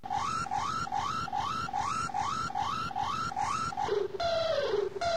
speed alarm
Just speeded up the whining to make it more urgent. Squealing drone that makes an I once used as intro if you just add some fade at the end. This is part of a pack that features noises made by a small malfunctioning house fan that's passed its primed.
abuse, alarm, broken, defective, domain, fan, malfunction, public, squeal